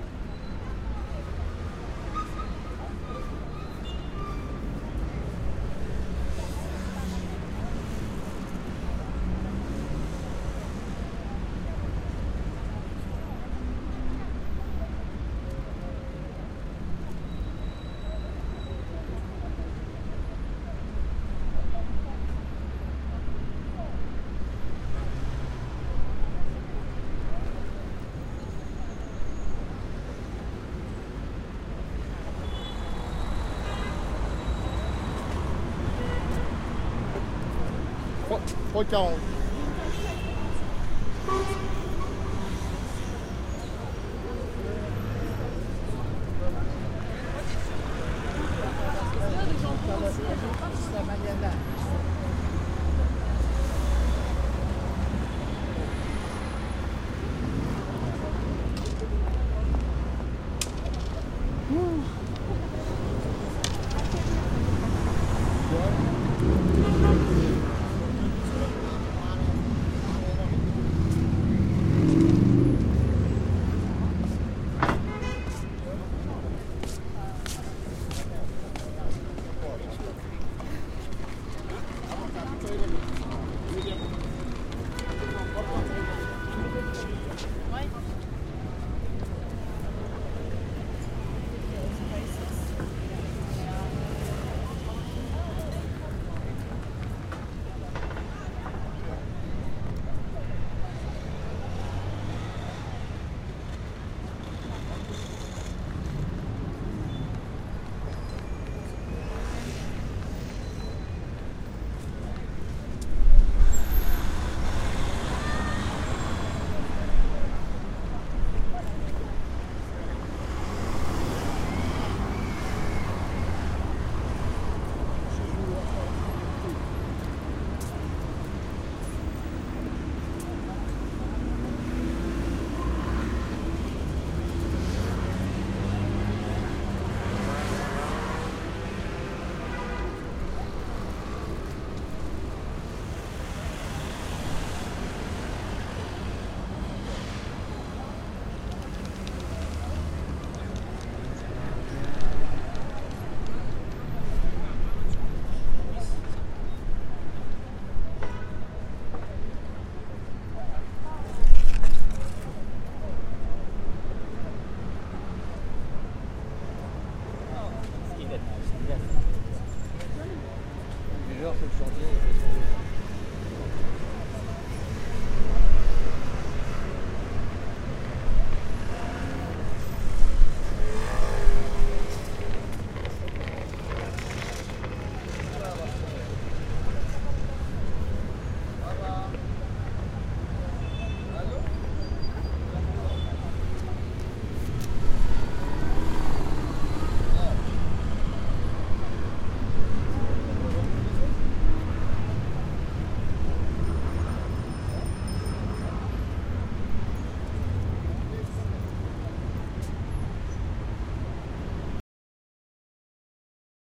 Sidewalk Cafe Paris
Mono recording of street ambiance in Paris. I was sitting in a sidewalk cafe at the corner of Boulevard de Denain and Rue La Fayette close to Gare du Nord on a sunny April afternoon. You can hear cars, passers-by, scooters, the waiter, someone putting coins on the table and so on. The recording was made with a Sennheiser ME 64 and a Fostex Fr-2.
cars, passers-by, street-noise, sidewalk-cafe, voices, paris